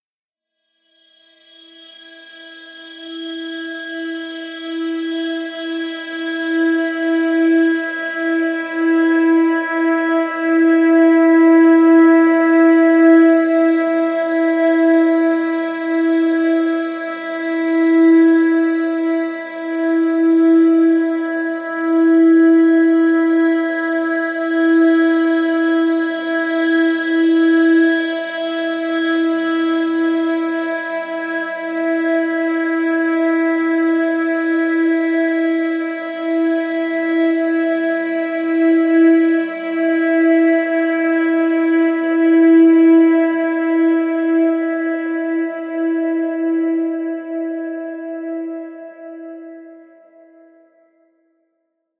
Pad 009 - Bending Hypnosis - E5
This sample is part of the “Pad 009 – Bending Hypnosis” sample pack. Metallic sweep pad. The pack consists of a set of samples which form a multisample to load into your favorite sampler. The key of the sample is in the name of the sample. These Pad multisamples are long samples that can be used without using any looping. They are in fact playable melodic drones. They were created using several audio processing techniques on diverse synth sounds: pitch shifting & bending, delays, reverbs and especially convolution.